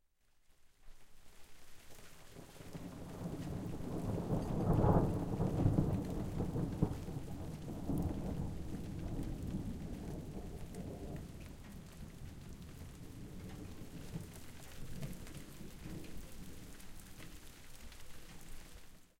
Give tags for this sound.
rain Storm thunder weather

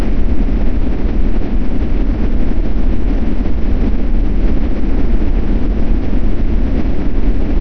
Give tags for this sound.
jet rocket thruster

